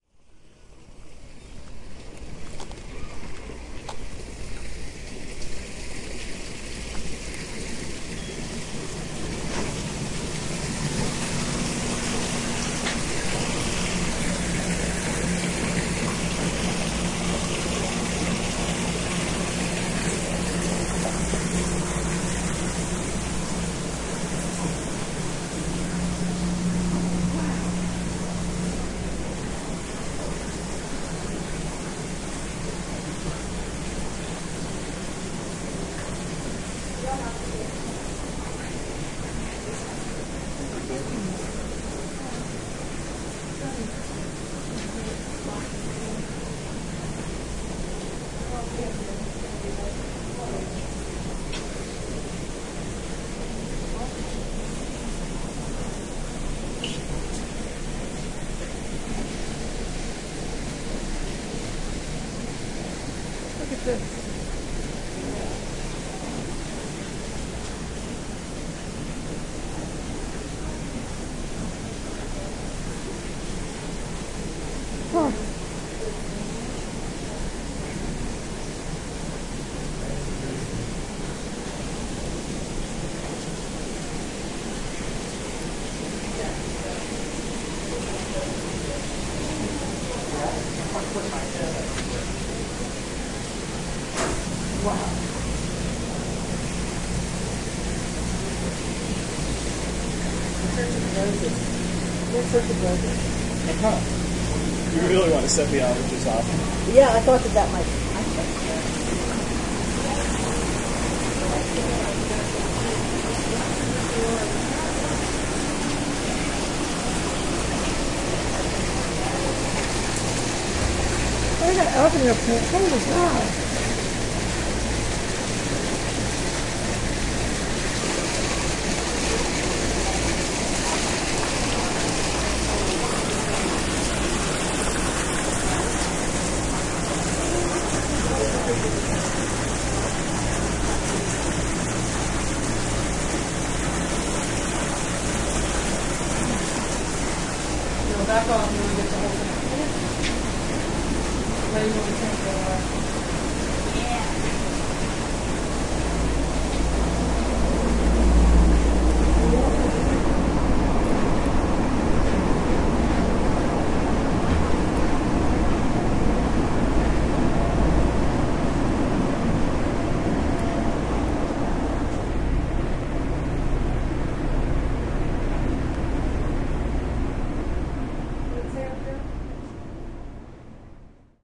in conservatory01
We leave the outdoor gardens and enter the conservatory building complex. This is a series of themed rooms and working areas. We enter at the west end of the complex, a large room called the Cascade Garden. The ceiling is about 50' high, and the room is full of tropical plants. You'll hear the flow of water and the hum of pumps and fans. You'll also hear the murmuring of other visitors and some oohs and wows as we view the exotic plants. At 1:34 the misting system kicks in with a clank and a hiss. There are bits of conversation overheard as we climb a winding path through the room. At around 1:55 we approach a waterfall and a pool at the upper end of this room. We cross a long glass hallway (the Fern Passage) into the Growing House, which is filled with flats of young plants, at around 2:38. You'll hear different fans running- sadly, there were a lot of handling noises on the mic here so I had to fade out.
hissing, murmurs, rainforest, water